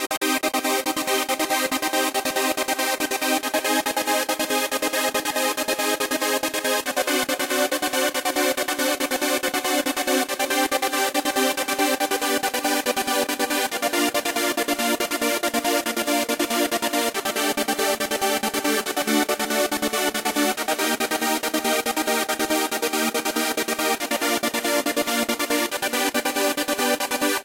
A dark feeling synth sequence
melody, phase, sequence, strings, synth, techno